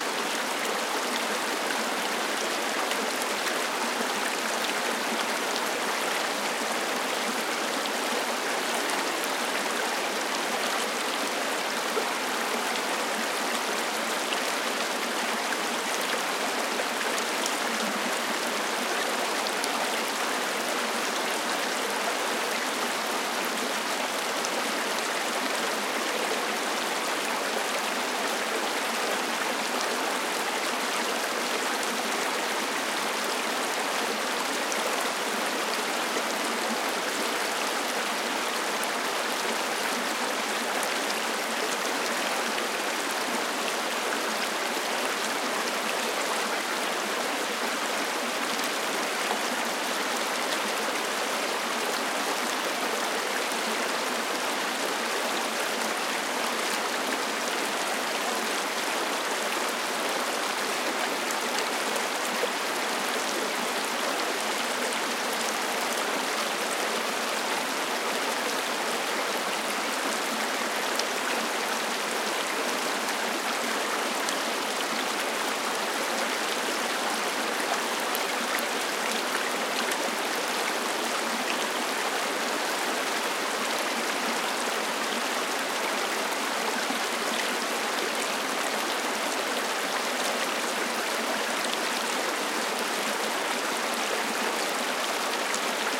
twinfallscreek03 creek
Creek in Glacier Park, Montana, USA